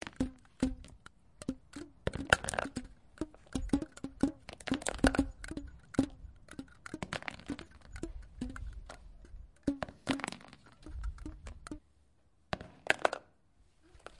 soundscape SGFR stella leandre
first soundscape made by pupils from Saint-Guinoux
cityrins, france, saint-guinoux, soundscape